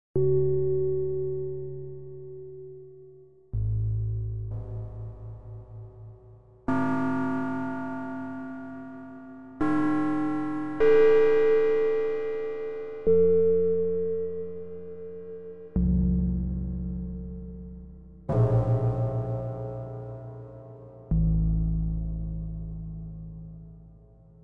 Slow Aalto4
This pack comprises a series of sounds I programmed in the Aalto software synthesizer designed by Randy Jones of Madrona Labs. All the sounds are from the same patch but each have varying degrees of processing and time-stretching. The Slow Aalto sound (with no numeric suffix) is the closest to the unprocessed patch, which very roughly emulated a prepared piano.
prepared-piano, Madrona-Labs, processed, soft-synth, time-stretched, electronic, Aalto